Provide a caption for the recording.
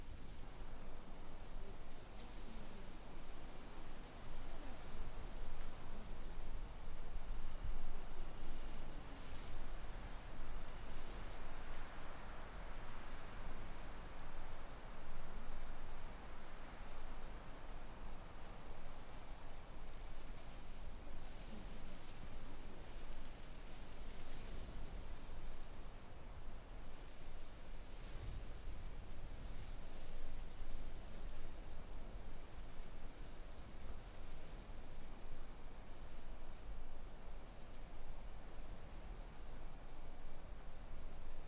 Ranch Ambiance Stronger Wind Trees Field Grass 01
This is a recording of a field ambiance on a ranch.
Ambiance, Horse, Ranch